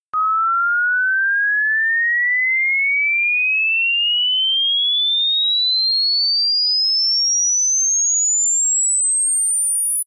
sliding frequencies-1.25khz to 10khz
Pack of sound test signals that was
generated with Audacity
audio, signal, sound, test